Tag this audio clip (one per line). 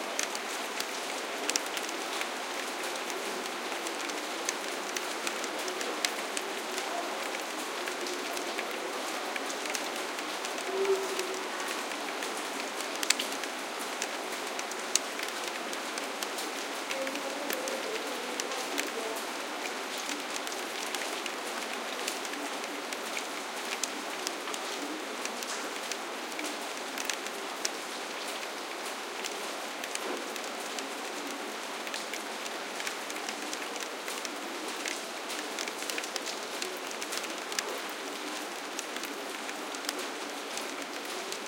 city
rain
water